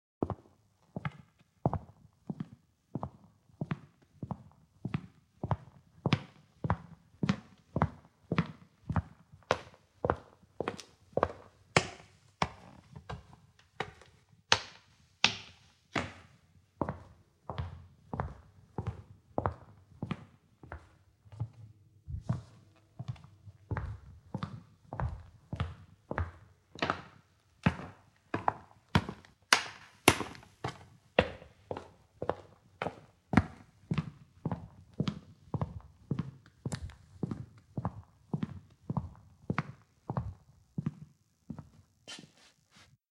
Recording of footsteps on a wooden floor, wearing shoes (Sneaky Petes). I walk from a solid wood floor to a corridor, up a short flight of stairs to another room with a laminated wood floor, then back to the first room. The ambiance changes slightly in the different rooms. I used an SM58 and an iRig Pro on an iPad using Garageband and mastered in Cubase.